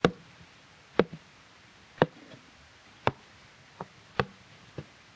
mco walk b01
Footsteps...
NOTE:
These are no field recordings but HANDMADE walking sounds in different speeds and manners intended for game creation. Most of them you can loop. They are recorded as dry as possible so you should add the ambience you like.
HOW TO MAKE THESE:
1. First empty two bottles of the famous spanish brandy Lepanto.
2. Keep the korks - they have a very special sound different from the korks of wine bottles.
3. Then, if you're still able to hit (maybe you shouldn't drink the brandy alone and at once), fill things in a flat bowl or a plate - f. e. pepper grains or salt.
4. Step the korks in the bowl and record it. You may also - as I did - step the korks on other things like a ventilator.
5. Compress the sounds hard but limit them to -4 db (as they sound not naturally if they are to loud).
ground, walks, footsteps, steps, walking, floor